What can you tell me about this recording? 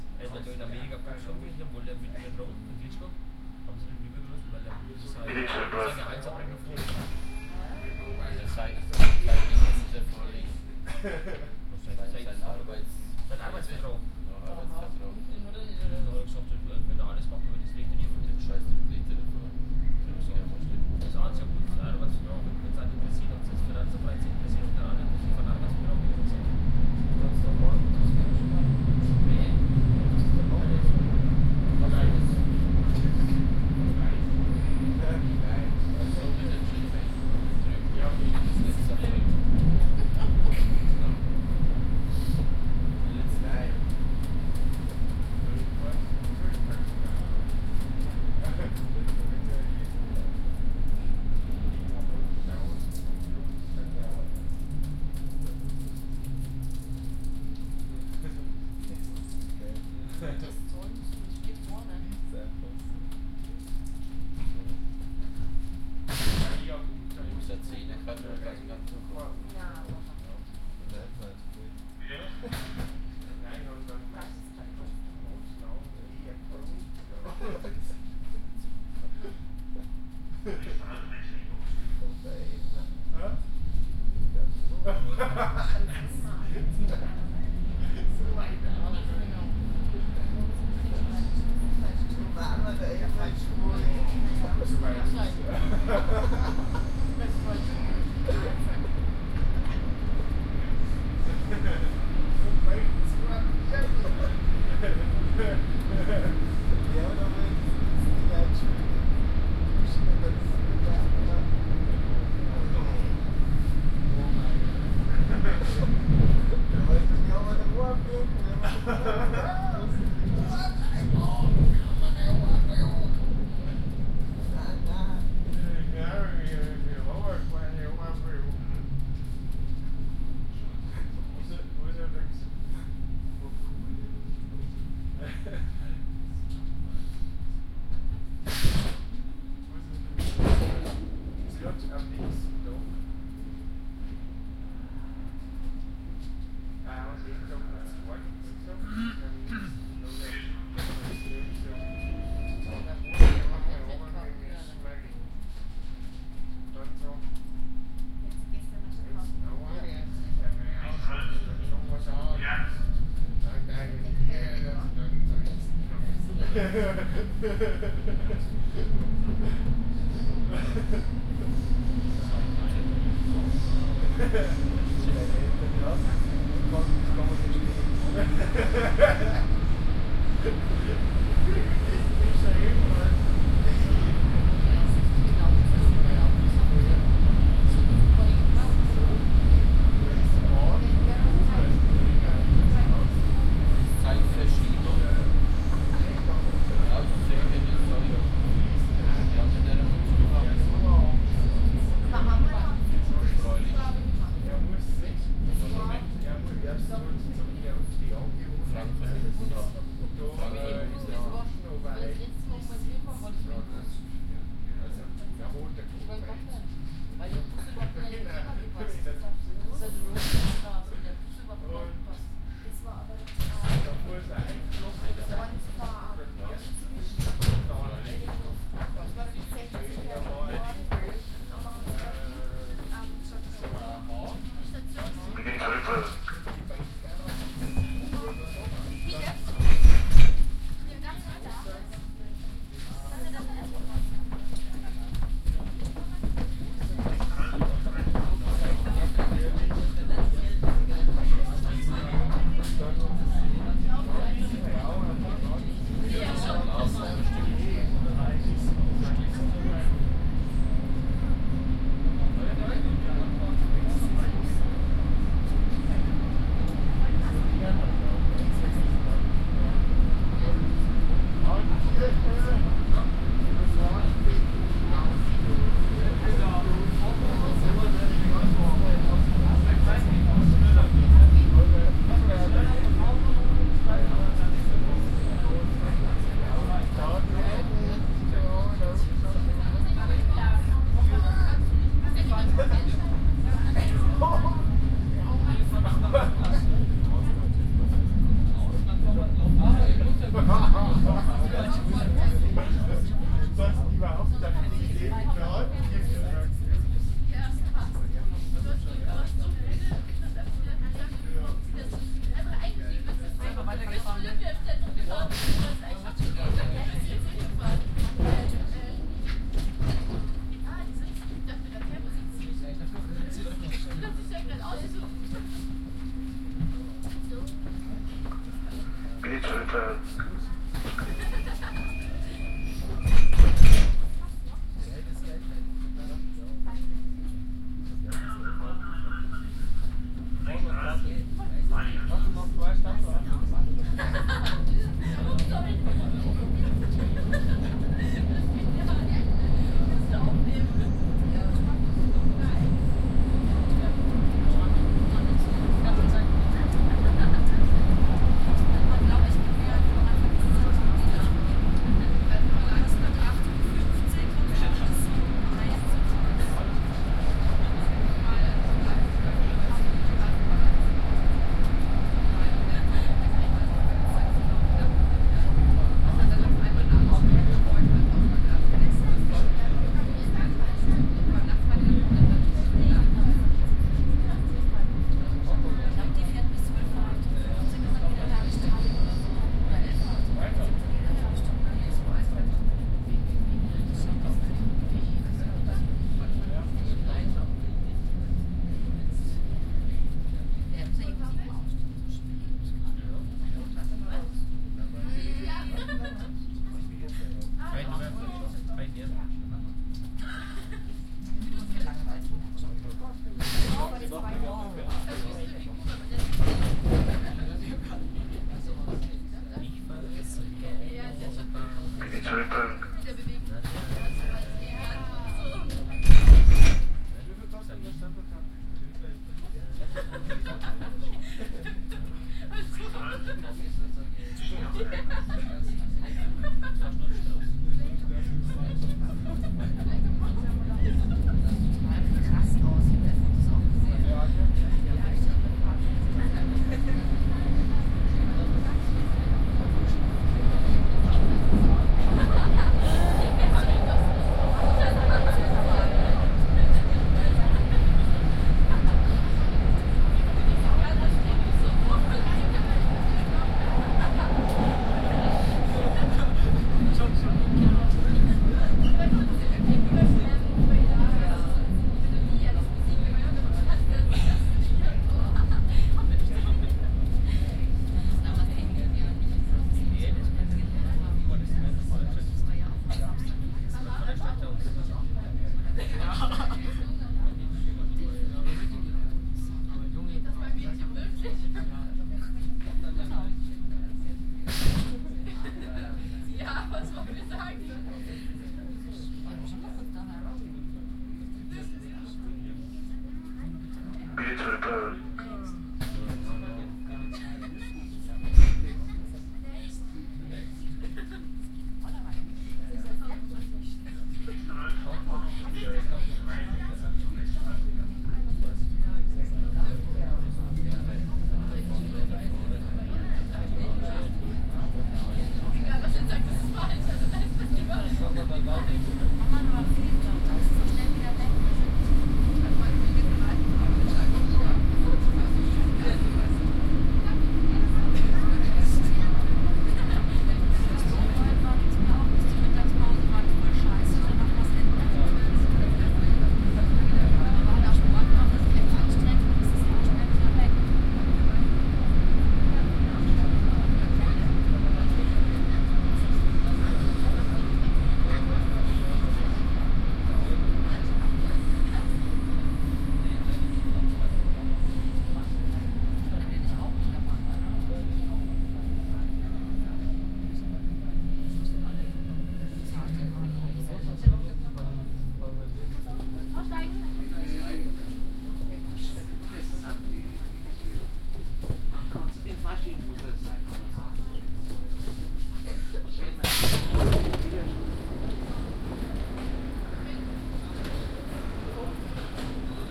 201002062200-Fahrt-U-Bahn
Binaural recording. Used in-ear microphones. It's the ambient sound I recorded riding a subway train in Munich.
binaural, field-recording, germany, munich, ride, subway, train